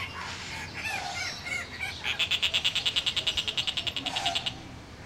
saz rollers
single Racket-tailed and Blue-bellied Rollers calling. The Racket-tailed Roller makes the high-pitched constant calls and the Blue-bellied Roller makes the laughing call.
aviary, birds, exotic, jungle, rainforest, roller, tropical, zoo